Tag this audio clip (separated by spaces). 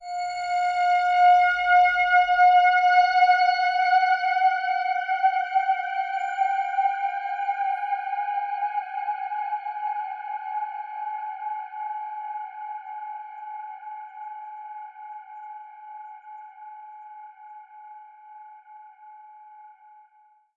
plug logic hell horror jitters sculpture atmo dark